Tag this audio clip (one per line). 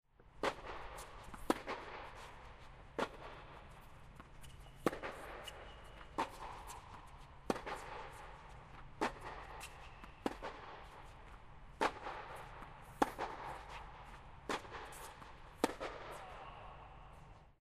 dome
tennis
feet
long
squeaking
ball
playing
bounce
close
bouncing
racket